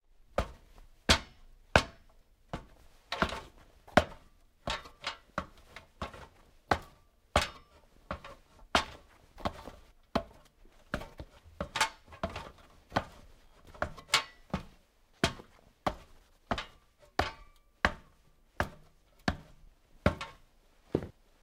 boots on aluminum ladder 02
Boots climbing an aluminum ladder
climbing, aluminum, step, footstep, steps, footsteps, walk, boot, ladder, foot, shoe, foley, metal, boots